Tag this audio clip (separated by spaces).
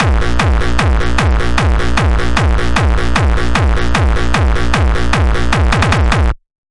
bass bass-drum bassdrum beat distorted distortion drum gabber hard hardcore hardstyle kick kickdrum techno